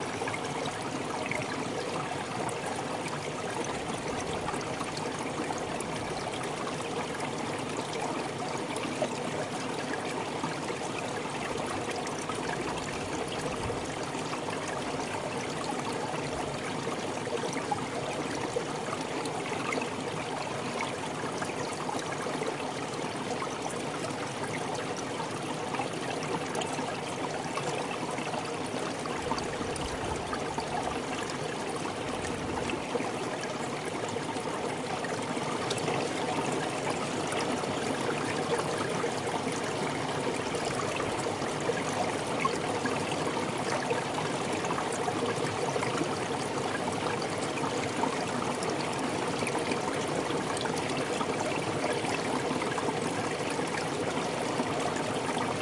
Water trickling beneath a field of boulders.
flow, gurgle, liquid, stream, trickle, water